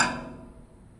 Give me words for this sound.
steel bench hit.2
One school steel bench one drumstick and h4n zoom.
steel, bench, zoom, h4n